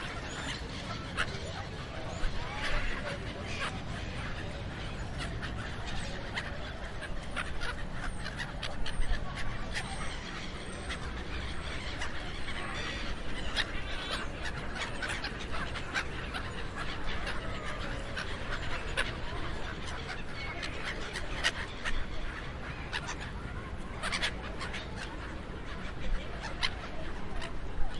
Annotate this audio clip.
Seagulls on Southbank
ambient, field-recording